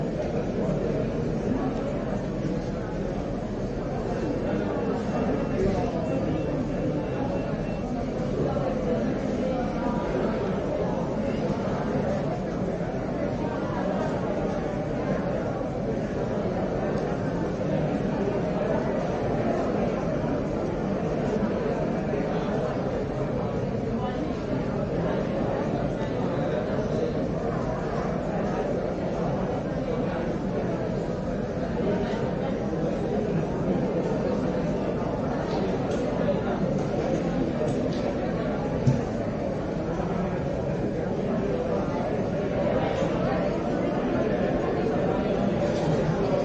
Airport Terminal Boarding Area Departure Lounge Ambience, 5.1 sound
passenger, field-recording, airplane, trip, airport, jet, traveler, transport, tourist, environment, hall, international, travel, journey, aircraft, person, departure, vacation, ambience, plane, terminal, train, tourism, ambient, binaural, baggage, indoor, speech, arrival, record